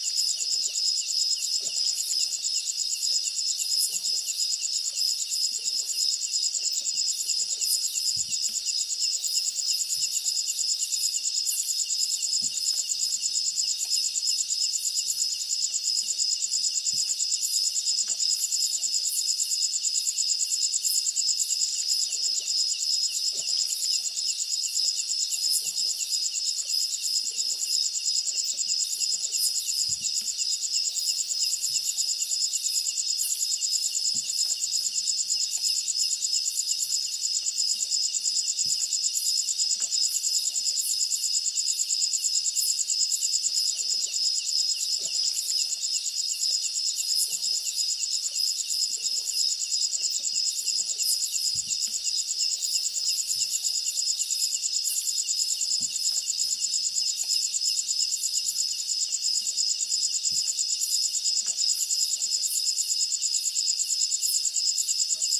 Thailand jungle night crickets +water knocks boat hull1
Thailand jungle night crickets +water knocks boat hull
water, night, boat, field-recording, crickets, Thailand